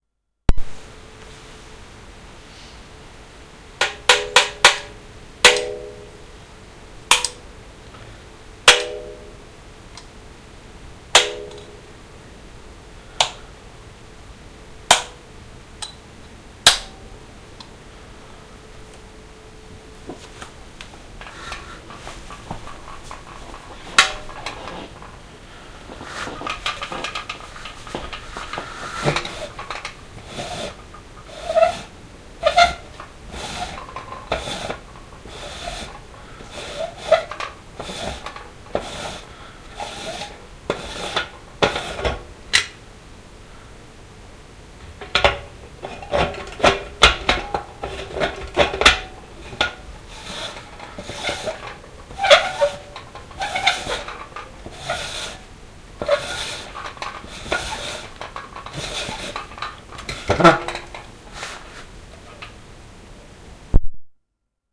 Tap unscrew old fire ext
Tapping on various parts of an old brass fire extinguisher with the handle end of a Stanley knife; then unscrewing the top, removing it, putting it back on and screwing it again. Recorded indoors onto minidisc with ECM-DS70P condenser mic at c. 1m range, unprocessed, some background noise.